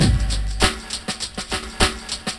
Drumloop - Classic breakbeat 6 (jungle) variant - 100 BPM
Variant of Drumloop - Classic breakbeat 6 (jungle) with the third snare in the fourth beat.
Made with HammerHead Rythm Station.
100, beat, bigbeat, bpm, break, breakbeat, dnb, drum, drum-loop, drumloop, drumnbass, drums, groovy, loop